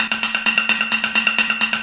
IMPROV PERCS 039 1 BAR 130 BPM
Sources were placed on the studio floor and played with two regular drumsticks. A central AKG C414 in omni config through NPNG preamp was the closest mic but in some cases an Audio Technica contact mic was also used. Two Josephson C617s through Millennia Media preamps captured the room ambience. Sources included water bottles, large vacuum cleaner pipes, a steel speaker stand, food containers and various other objects which were never meant to be used like this. All sources were recorded into Pro Tools through Frontier Design Group converters and large amounts of Beat Detective were employed to make something decent out of my terrible playing. Final processing was carried out in Cool Edit Pro. Recorded by Brady Leduc at Pulsworks Audio Arts.